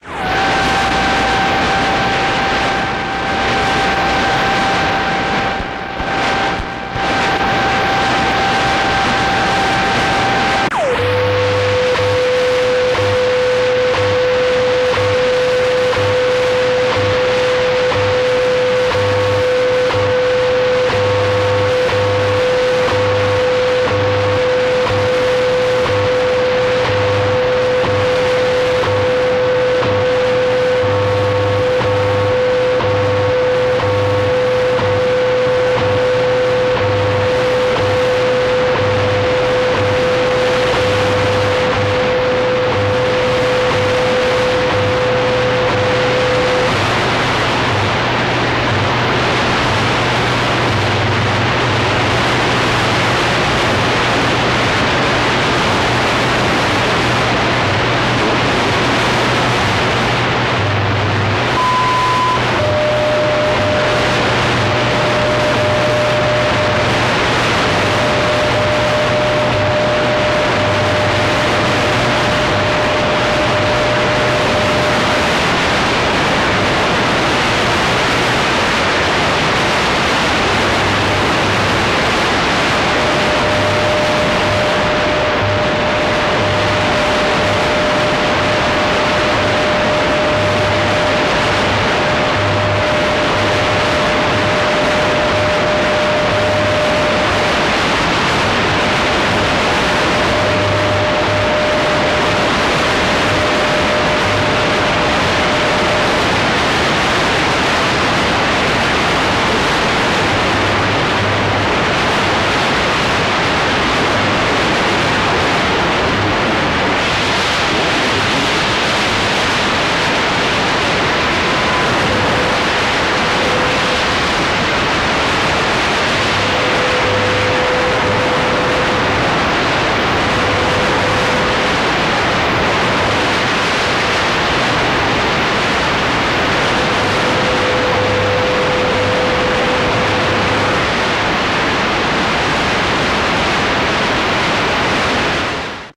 Radio Noise 11
Some various interference and things I received with a shortwave radio.
Radio, Interference, Noise, Radio-Static, Static